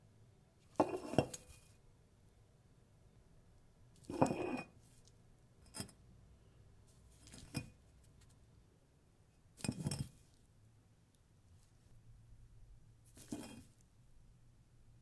Brick pickup sound - tile counter
Close mic, picking a brick up off a tile counter top and then setting it down. Several takes. Audio raw and unprocessed.
brick, brickle, bricks